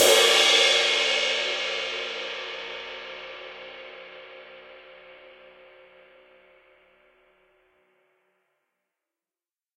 Multisampled 20 inch Istanbul Mehmet ride cymbal sampled using stereo PZM overhead mics. The bow and wash samples are meant to be layered to provide different velocity strokes.
stereo, cymbal, drums